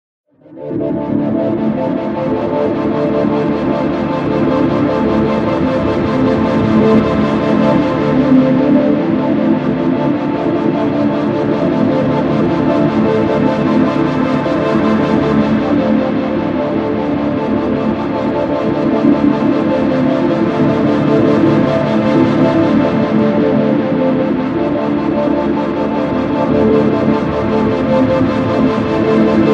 A luscious atmosphere made by adding various wet delay and reverb effects to a pad sequenced with a chord